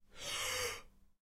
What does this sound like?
breaths solo6
Clean sample of a person breathing in rapidly, lot of air, 'shock-reaction.Recorded with behringer B1